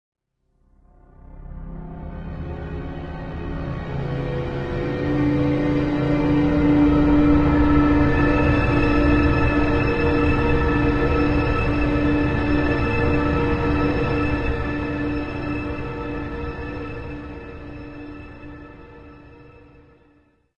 A view over a toxic disaster somewhere on earth. No life in sight near the accident... Created with SampleTank XL and the Cinematic Collection.
madness, panorama, shiver, shock, disaster, sceneario, dystophy, stinger, horror